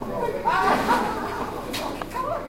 tourist laughing in the street in genova.
genova, street